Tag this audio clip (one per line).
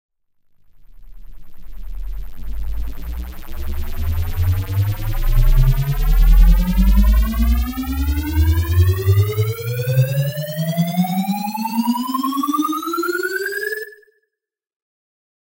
ambience
ambient
edm
electronic
fx
ghostly
impacts
loop
music
noise
powerup
riser
sfx
synth